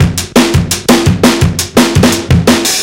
Mean break 170bpm
A mean 170 bmp break for dnb
drumandbass, break, dnb, 170bpm